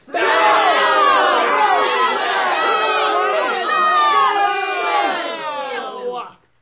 Boo 1 very angry
Small audience booing very angrily
group, theater